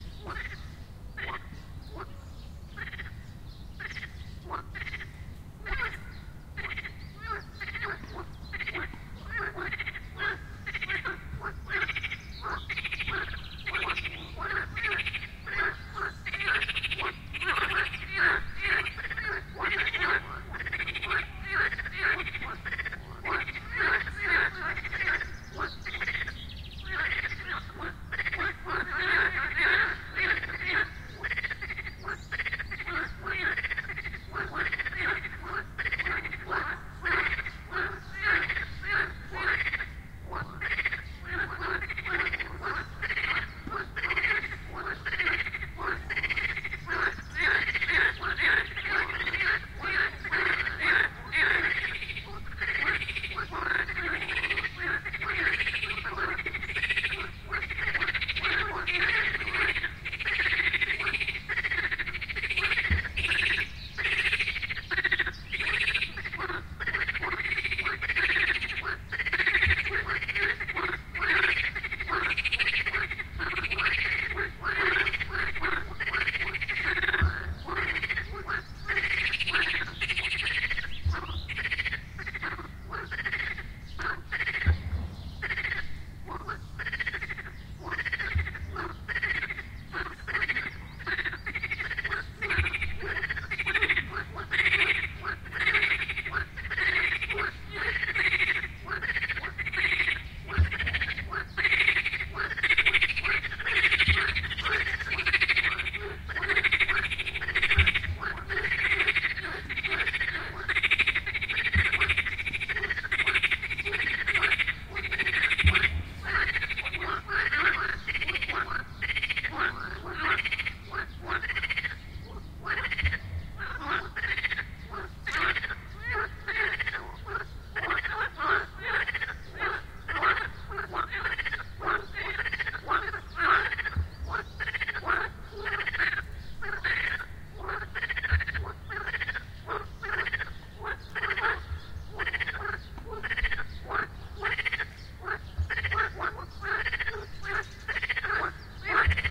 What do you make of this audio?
Croaking frogs or toads. Swamp in the city park.
Recorded: 2015-06-25
Recorder: Tascam DR-40
frog, croaking, toad, croak, swamp, frogs, toads, pond